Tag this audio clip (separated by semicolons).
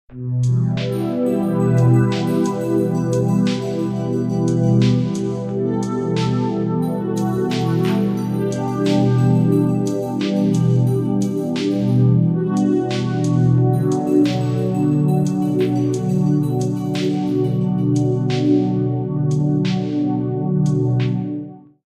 games; videogame; indiegamedev